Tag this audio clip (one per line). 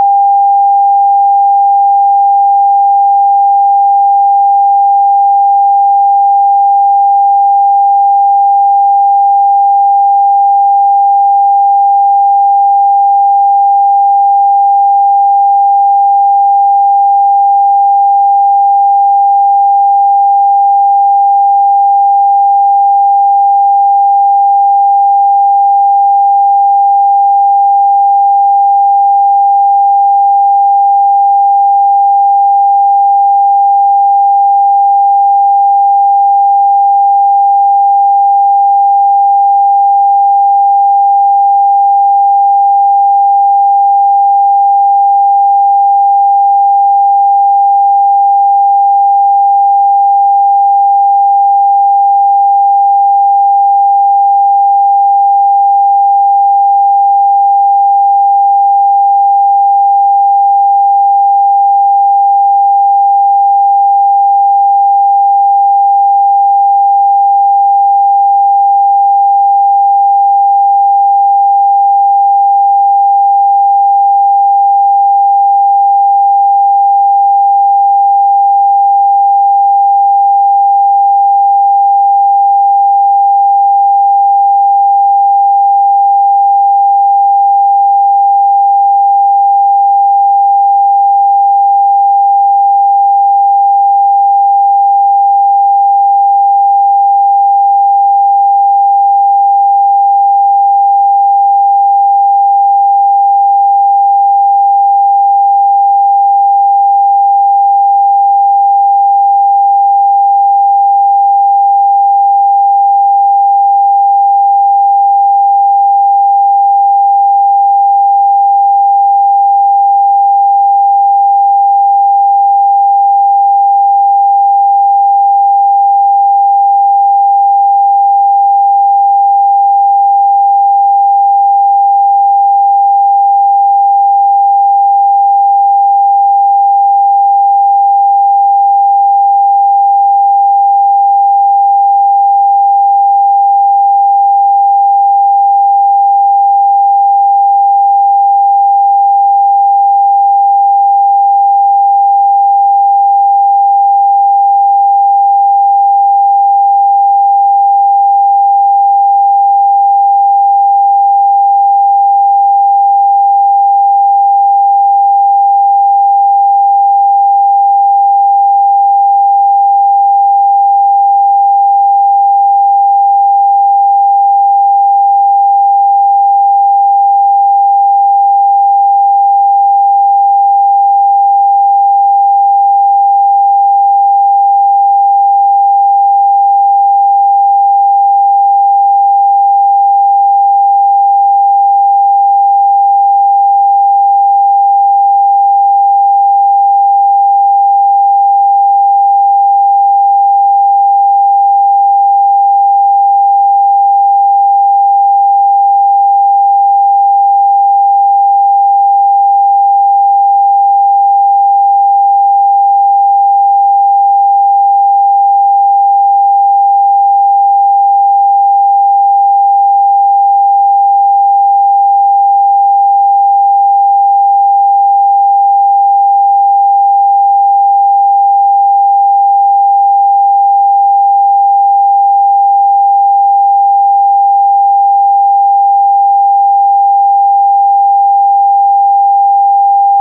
electric
sound
synthetic